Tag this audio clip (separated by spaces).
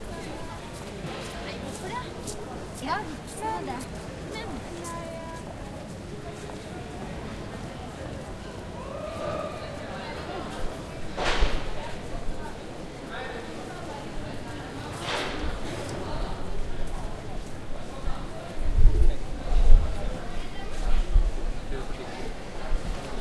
oslo norway train-station norwegian atmosphere